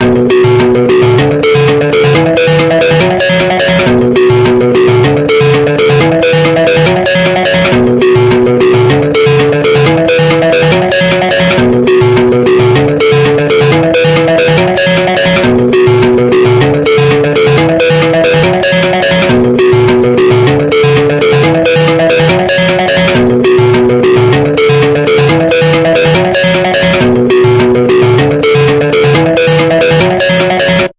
Heavy African Rave
A heavily processed kalimba sample and looped